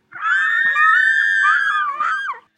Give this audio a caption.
Girls screaming. Really it's just me screaming really high. (Yes, my voice is insanely high.) All tracks layered in Audacity. Recorded using a Mac's Built-in Microphone. Can be used as fangirls or girls screaming in horror, or something else, it's your choice.